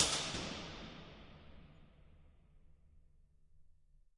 Concrete Tunnel 01
Impulse response of a long underground concrete tunnel. There are 7 impulses of this space in the pack.
Impulse, Tunnel, IR, Response, Reverb